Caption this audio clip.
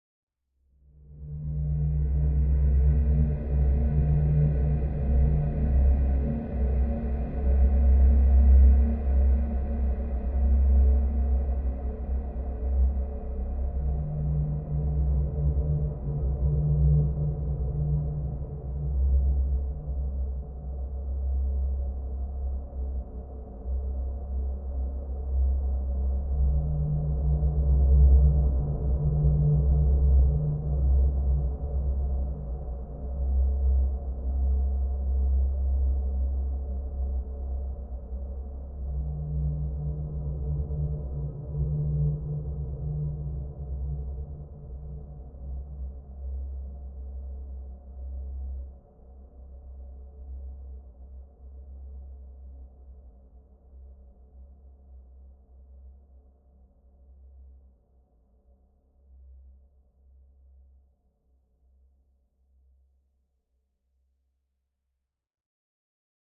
Horror scary ghost low-mid
drone, horror, Mood, soundscape, tone